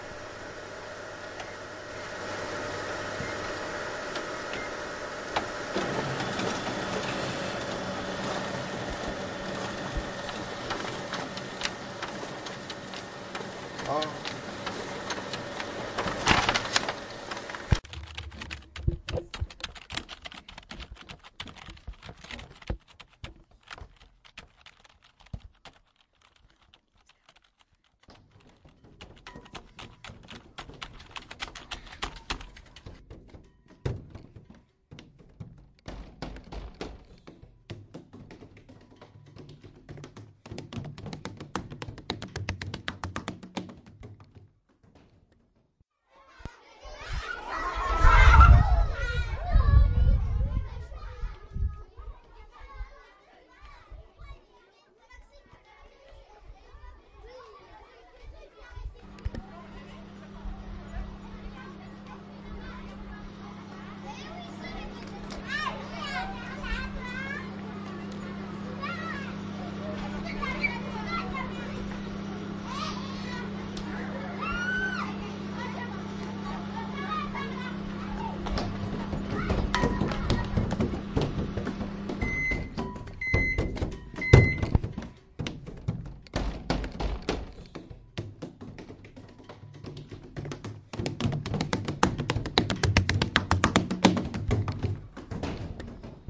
TCR soundpostcard-evan,maxime
France, Pac, Sonicpostcards